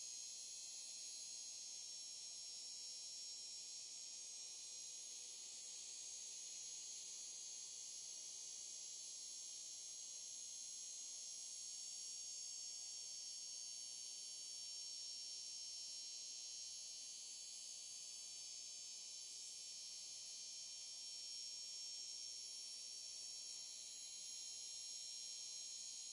metro subway Montreal fluorescent light buzz neon tunnel
buzz, metro, light, Montreal, subway, fluorescent, neon